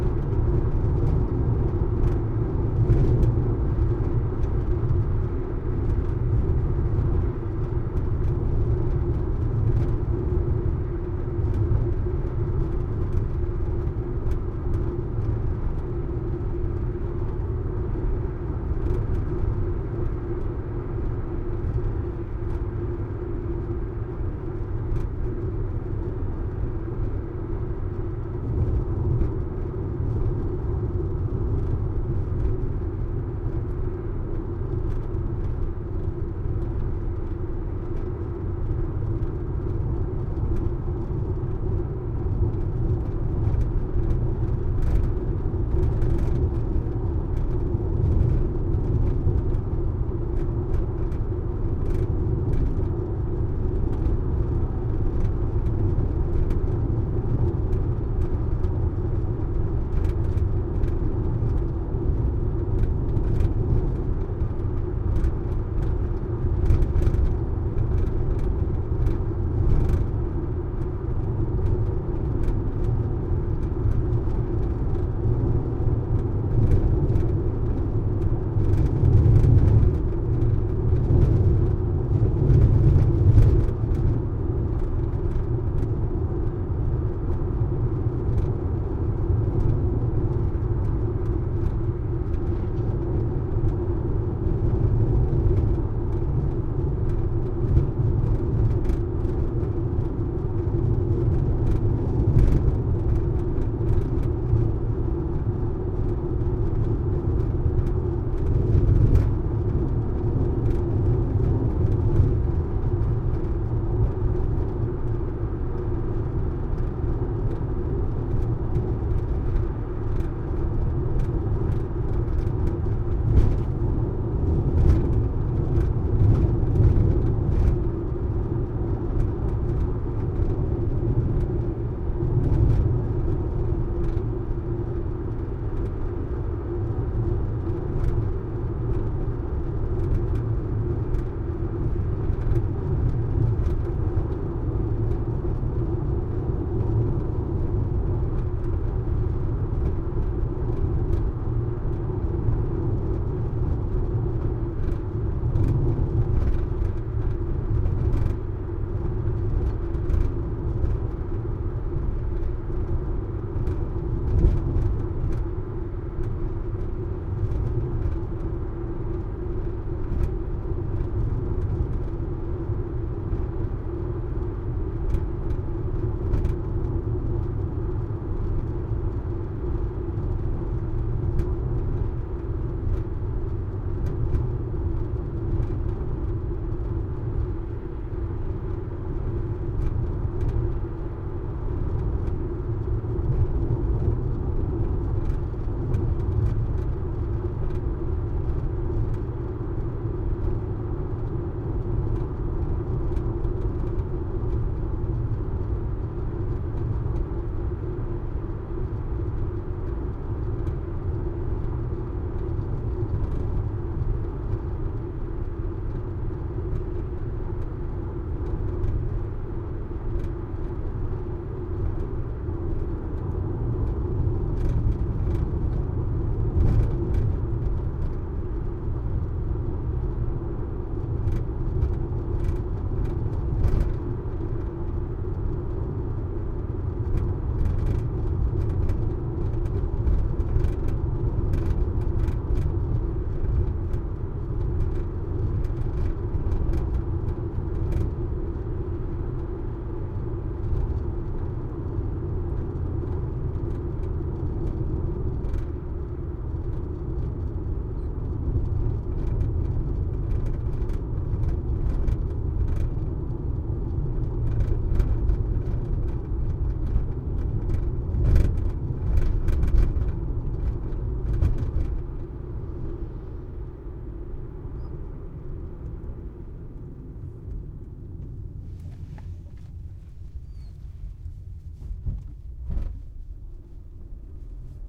auto int real rattly driving bumpy road fast speed 50kmph